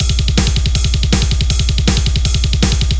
Double bass with ride and snare.